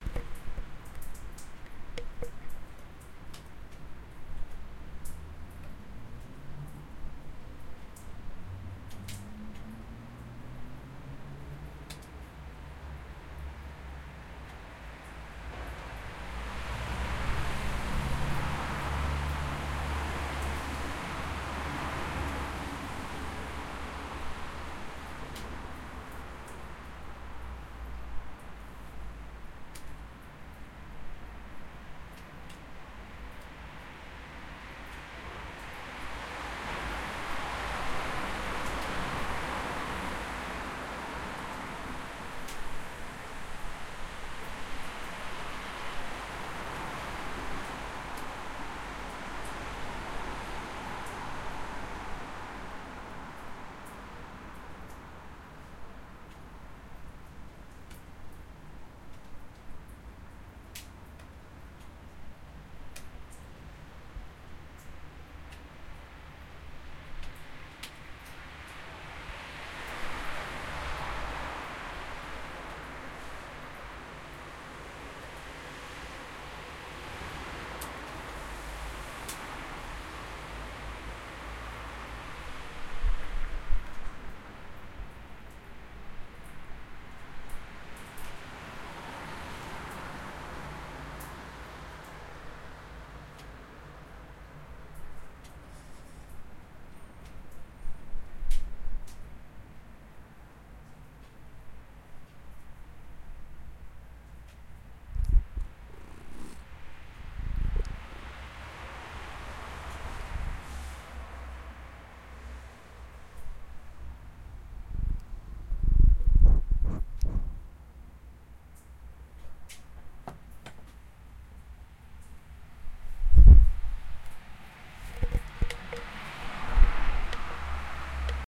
Ext. Night atmosphere rainy street
Night atmosphere recorded from the 2nd floor of my house. Busy street at aprox. 20 meters. There are cabs and busses passing.
Recorded with Zoom H4N & Sennheiser ME66 Long-gun.
ambience, atmosphere, night, rainy